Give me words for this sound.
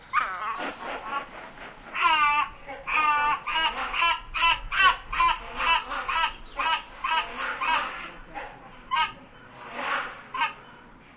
The exotic call of the Black Casqued Hornbill - also audible is the sound of its large wings in flight. Recorded at Le Jardin D'Oiseaux Tropicale in Provence.